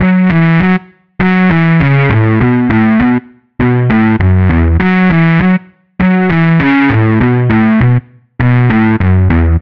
gl-electro-bass-loop-003
This loop is created using Image-Line Morphine synth plugin
trance, loop, electro, synth, electronic, dance, bass